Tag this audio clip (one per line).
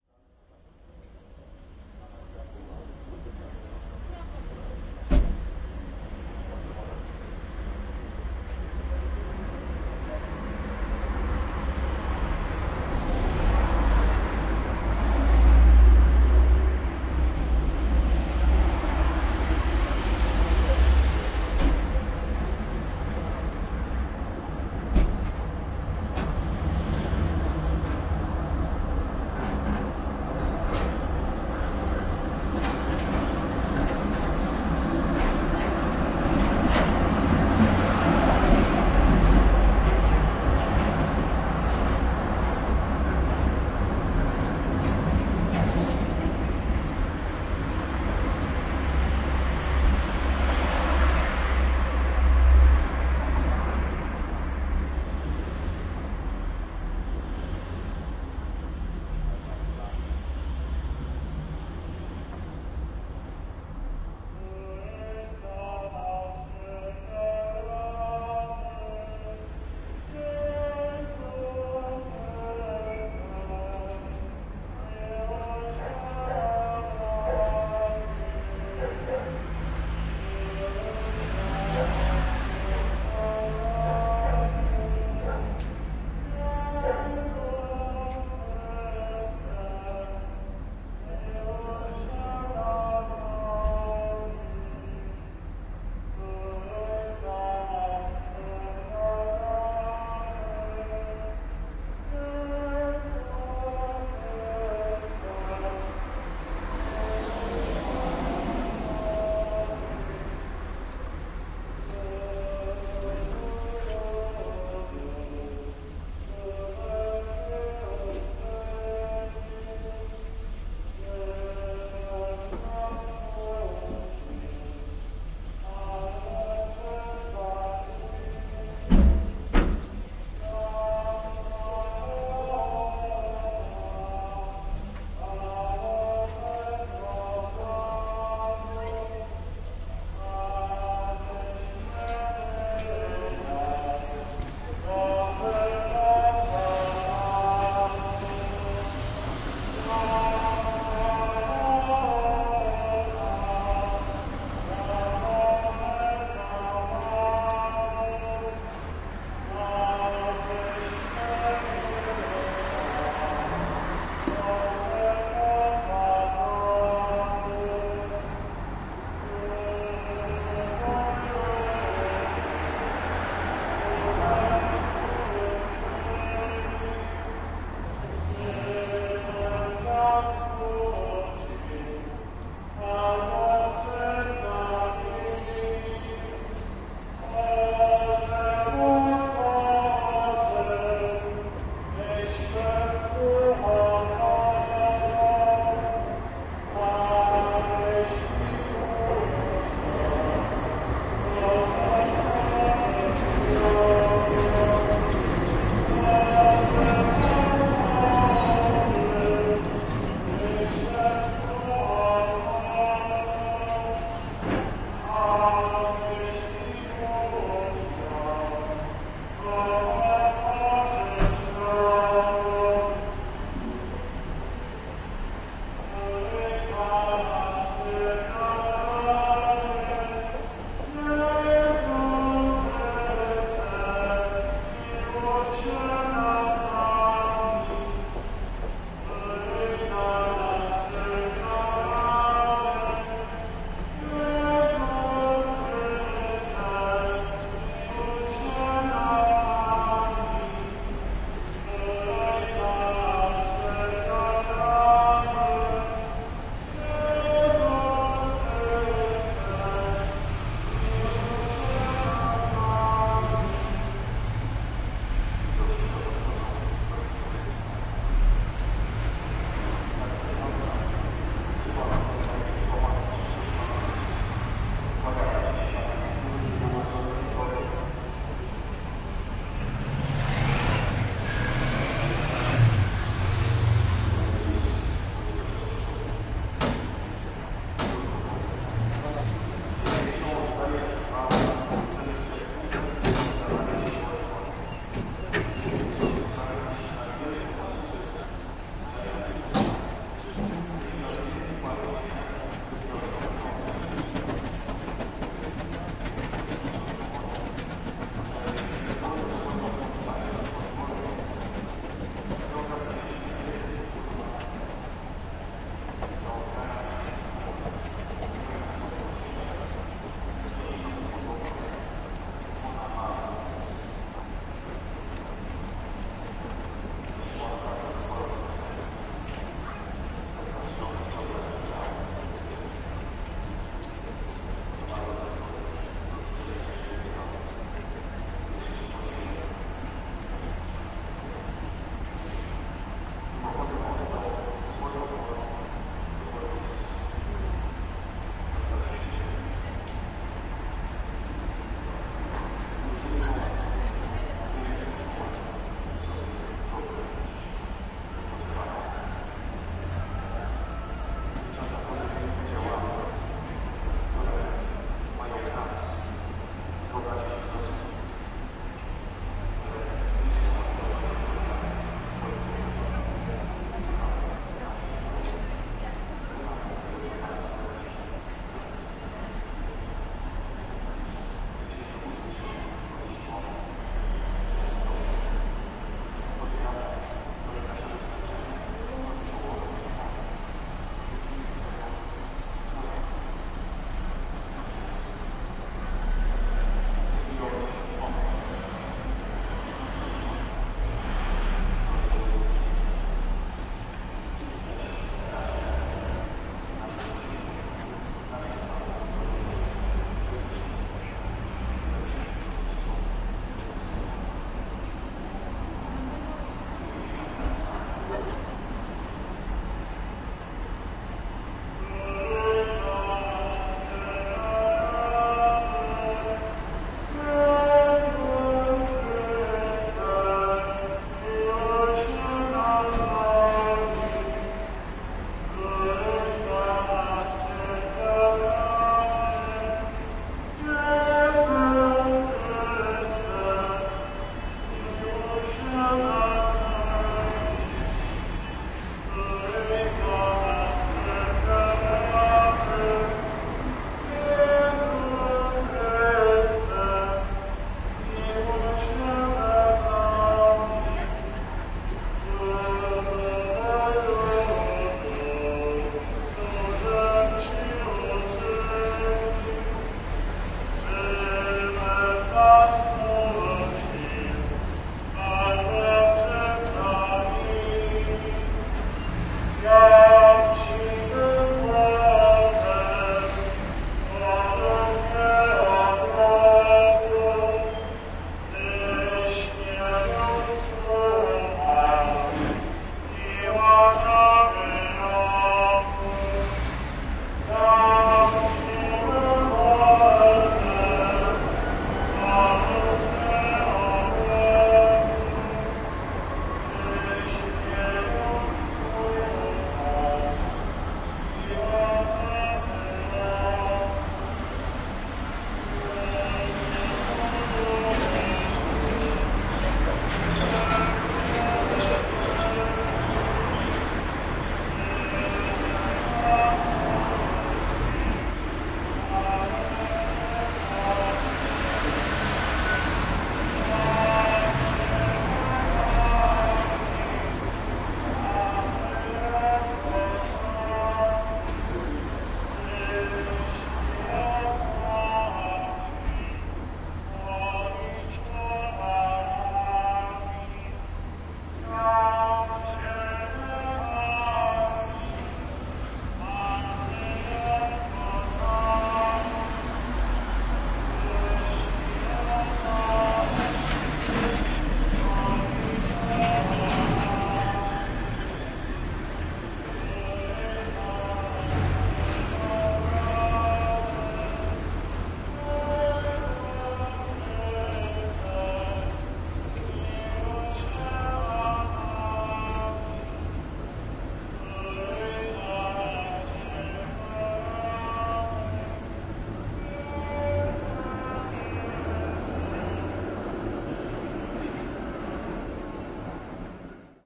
noise
catholic
poland
street
tramway
people
ambience
singing
religion
field-recording
voices
poznan
cars
procession
space
song
sermon
wilda